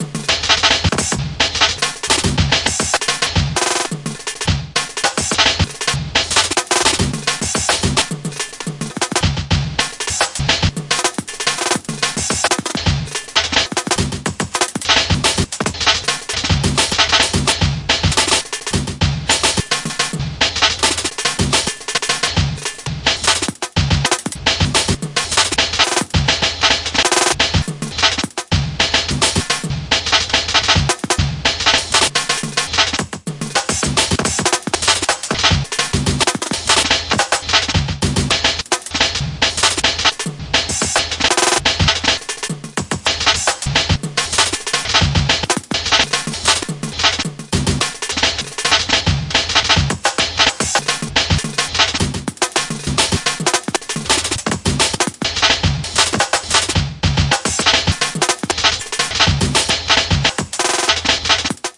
Another classic break mashup mix in ableton using drums loops.